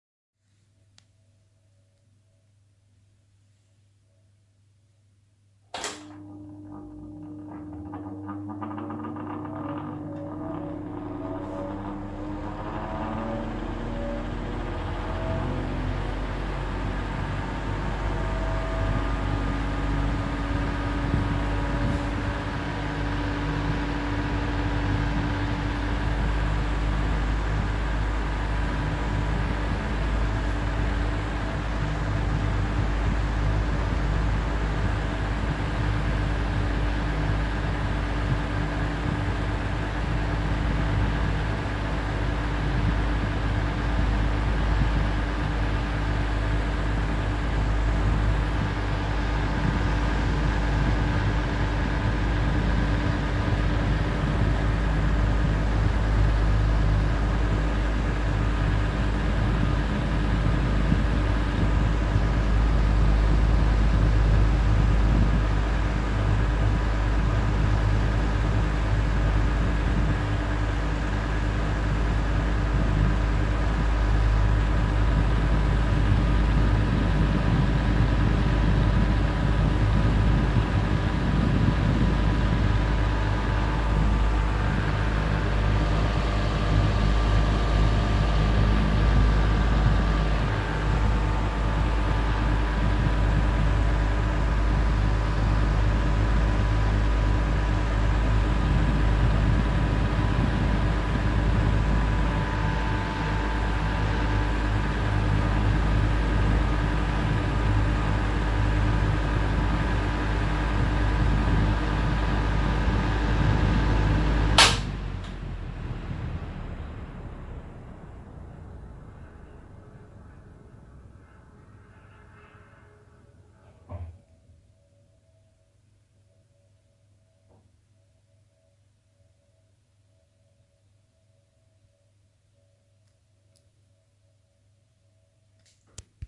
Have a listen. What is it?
just a recording of my fan using my phone